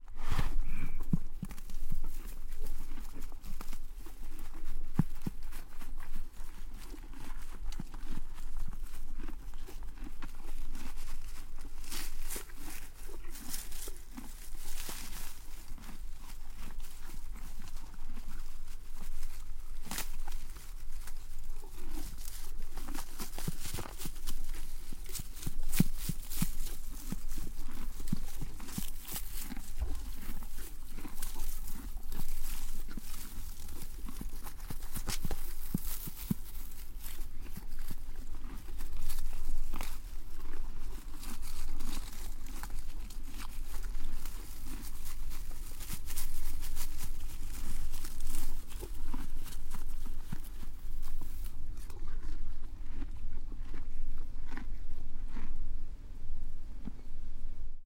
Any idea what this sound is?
Horse Eating Grass Hay 04
This is a recording of a horse eating hay out of a trough.
Hay, Horse, Eating